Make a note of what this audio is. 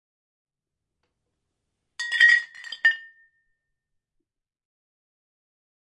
Panska CZ Czech Panská beer glass pub
pub, glass, beer